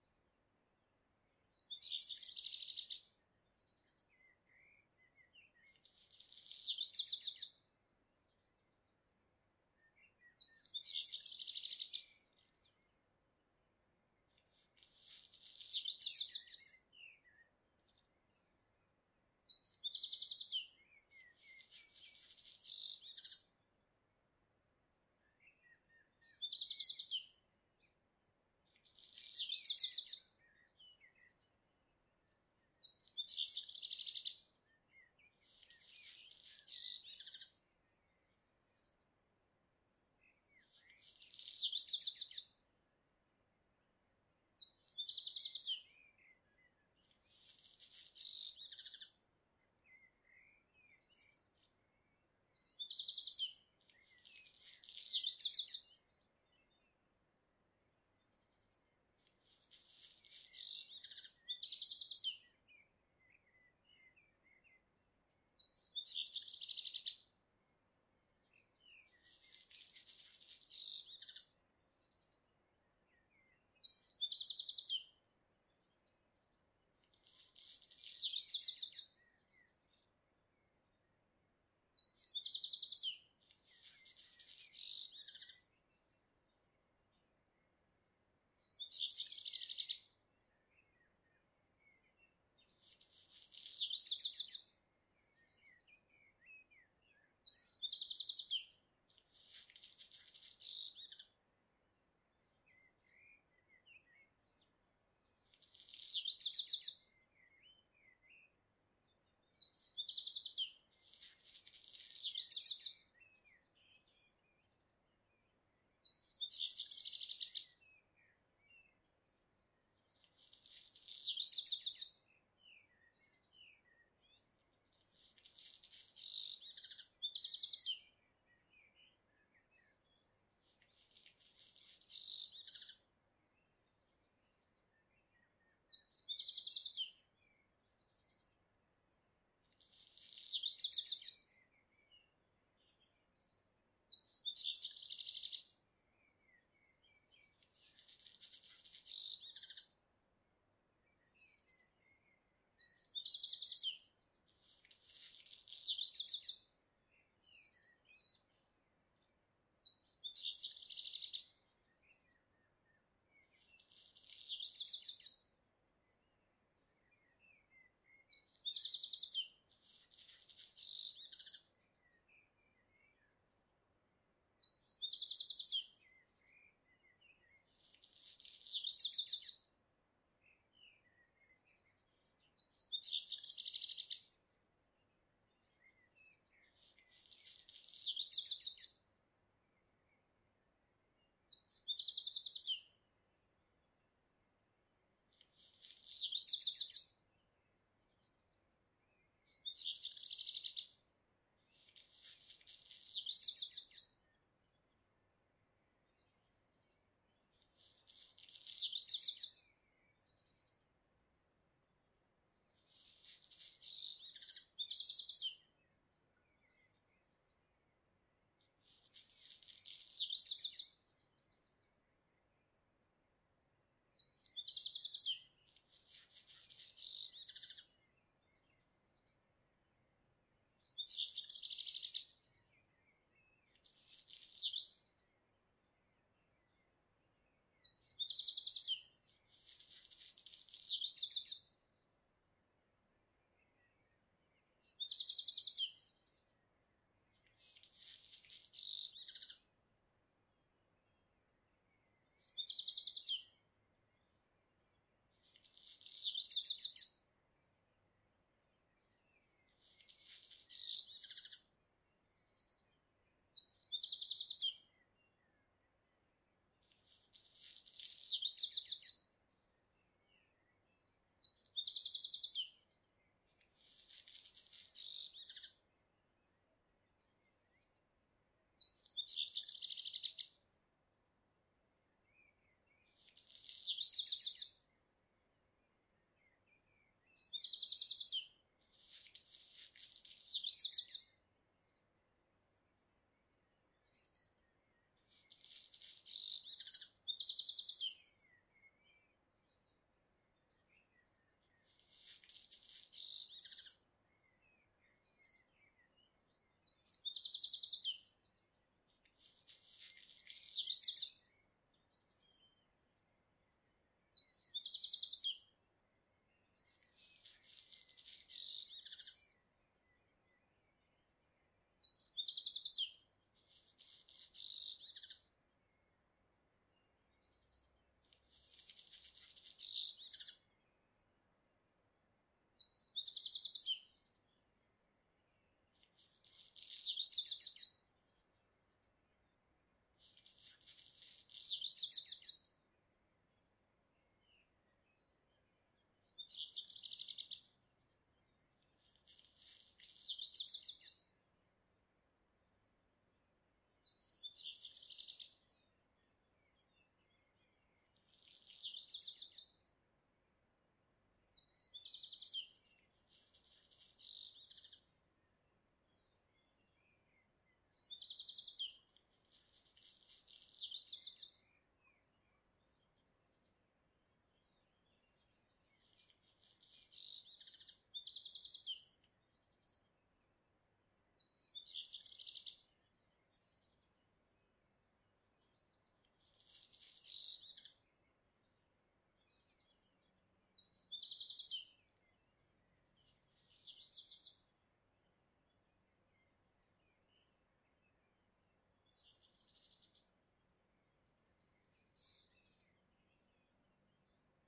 Early Morning Bird
An early bird sings its song on the roof. Maybe it is a black redstart. In distance You can hear also a blackbird. Recorded in June 2022, in an alpine village in Switzerland, Grison.
Field-recording, Dawn, Bird, Peaceful, Nature